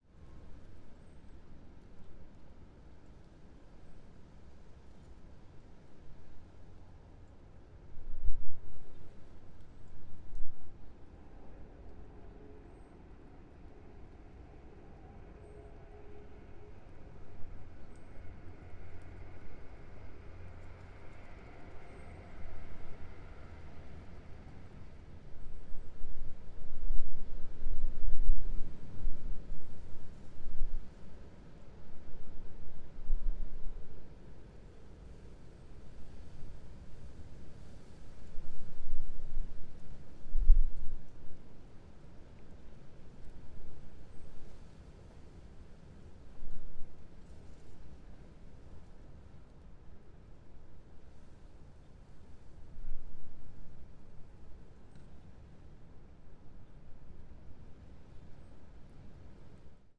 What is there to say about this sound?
A simple recording or a street on a wet day, It can be used for background noise to set a scene.
Recorded using a Rode NT-1